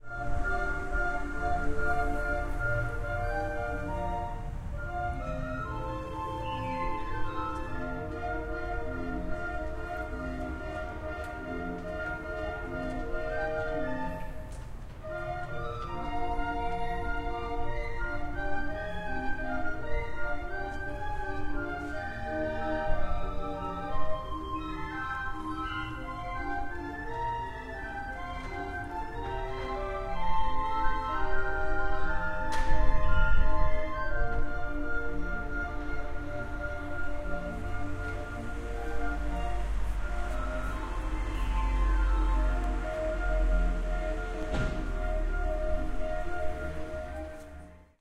Organ grinder paris1
Here is a street organ recorded in Paris from my 5th. floor balcony on rue Boursault. Very ambient, with reverberation between the buildings but a lovely real space. These sounds worked great in a recent film I worked on recently. They need some cleaning up. There is wind, etc... It's up to you.
de, paris, organ-grinder, field-recording, barbarie, orgue, street-organ